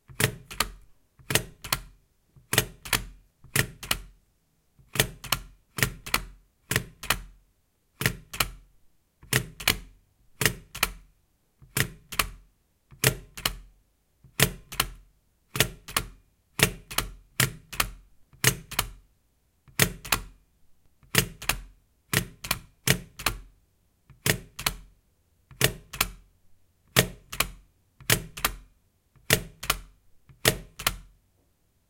Stapler Sound
Desk, Office, Stapler, Table